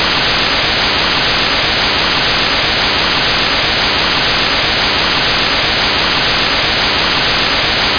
A noisy noise sound.